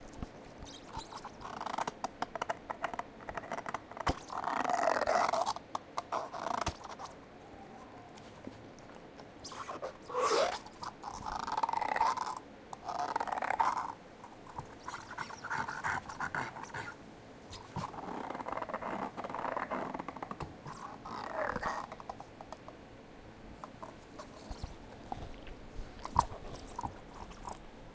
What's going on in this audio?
PHOEBE WITH RAQUETBALL 1
My dog chewing on a raquetball.
ball, creepy, dog, pop, rubber, rubber-ball, squeek, weird